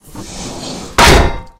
Piston wind up followed by metal impact. Created for a game built in the IDGA 48 hour game making competition. Original sound sources: car door struts opening, metal drain grates and road signs being abused with various objects. Samples recorded using a pair of Behringer C2's and a Rode NT2g into a PMD660.